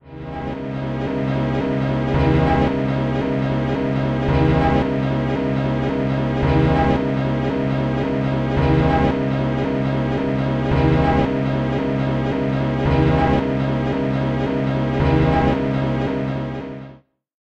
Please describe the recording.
bl lp02
120bpm loop made in Blip1.1